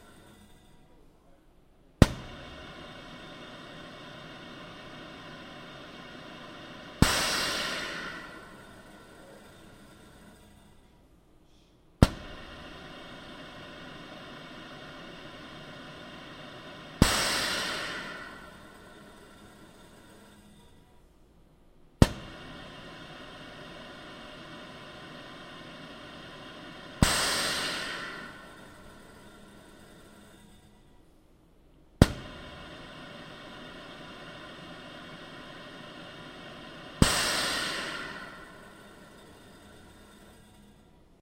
Vader Machine
This is a machine I recorded from a lab that designs water meters, and other water movement equipment. It actually has nothing to do with breathing, but we joked that it had a Vader like quality to it. Enjoy!
Vader, breathe, breathing-machine, lung, lung-machine, machine, water